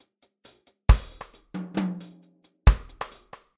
Reggae drum loops